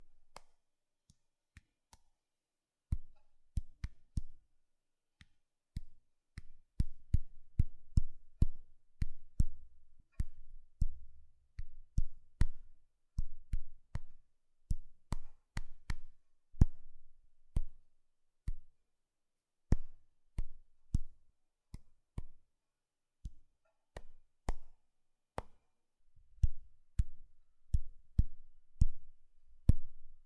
iPhone taps
Tapping on a smart phone screen.
Recorded using Focusrite amps and sE Z3300 A Mic.
taps, clicks, smart, phone, iphone